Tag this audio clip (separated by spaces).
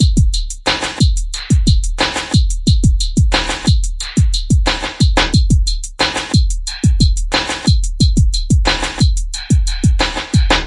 sample korg drums loop bpm edm hydrogen pack pattern dance groove library kick free beat fills